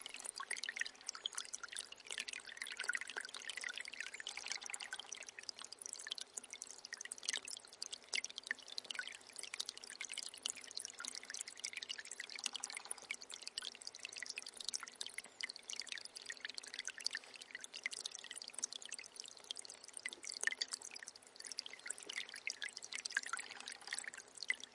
Sound of water stream
Recorded in Mátrafüred (Hungary) forest with a Zoom H1.
water, nature, forest, sound